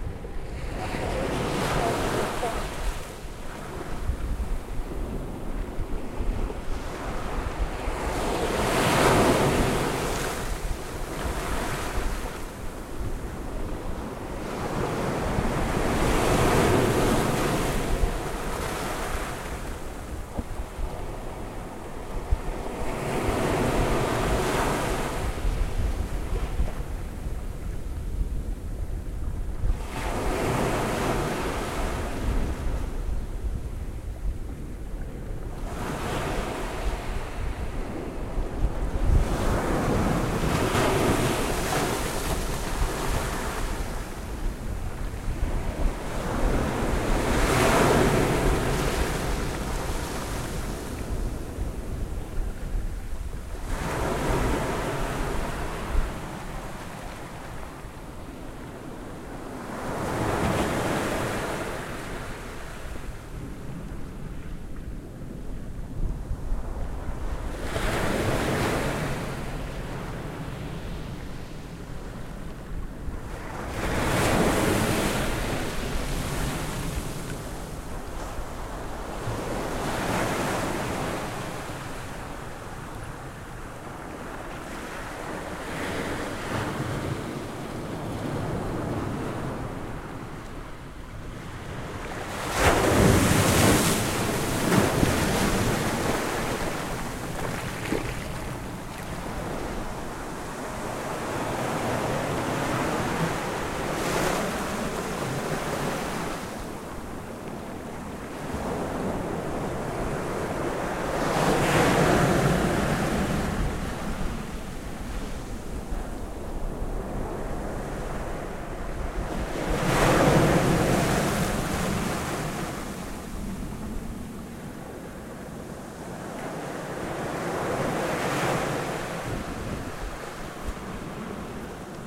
Hacsa beach Coloane macau